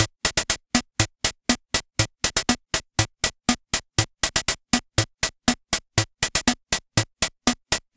MusiTech MK-3001 rhythm rhumba
The electronic rhumba rhythm from a MusiTech MK-3001 keyboard. Recorded through a Roland M-120 line-mixer.
MusiTech, electronic, beat, loop, rhythm, MK-3001, keyboard